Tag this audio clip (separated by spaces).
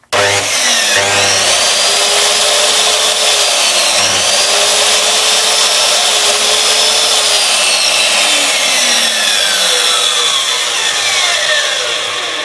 saw machine electric cut machinery woodwork circular mechanical